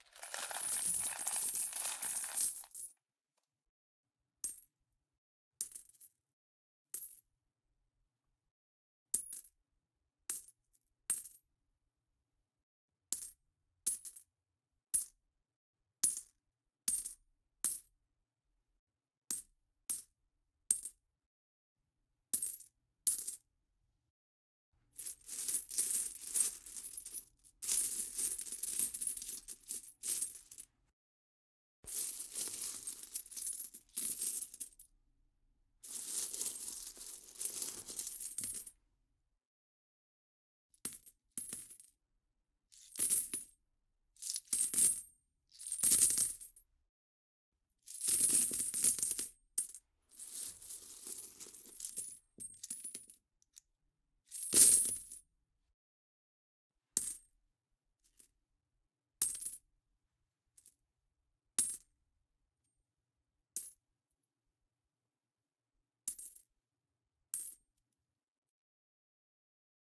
Playing and dropping Coins
Dropping small and large amounts of coins into a pile of coins.
dime, coin, money, coins, cents, dollar